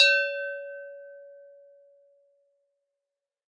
Giant Primark Prosecco Glass 1
Giant Primark Prosecco Glass (launched in AW 18) 750ml glass.
Recorded using Rode NTK and Audient id22 mic pre. Low pass swept and XNoise applied.
Enjoy!